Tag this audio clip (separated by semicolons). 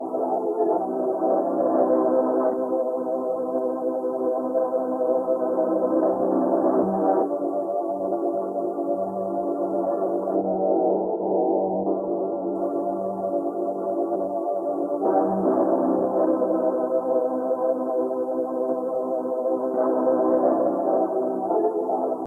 choir; tape; chop; reel-to-reel